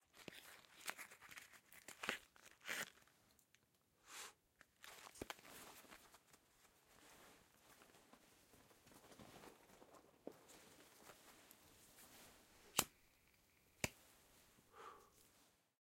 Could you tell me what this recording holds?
Recoreded with Zoom H6 XY Mic. Edited in Pro Tools.
A person opens a pack, gets a cigarette, then searches his pockets for a lighter and finally smokes.